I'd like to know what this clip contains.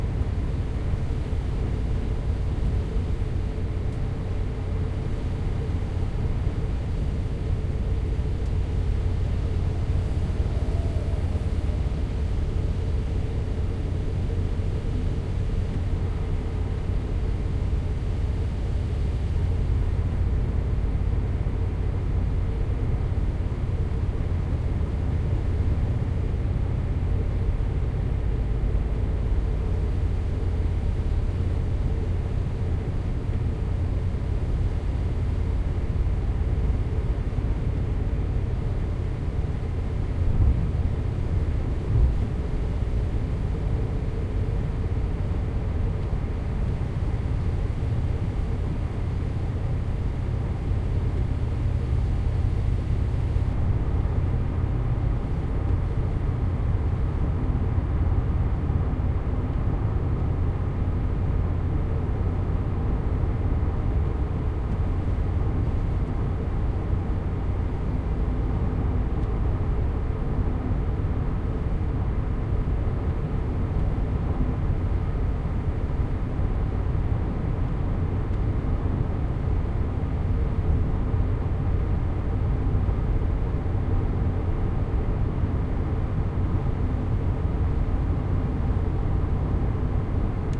INTO A CAR DURING A HIGHWAY TRAVEL
Son capté à l'intérieur d'une voiture durant un trajet sur l'autoroute. Son enregistré avec un ZOOM H4NSP.
Sound taken in a car during a travel on the highway. Sound recorded with a ZOOM H4NSP.
autoroute, car, highway, voiture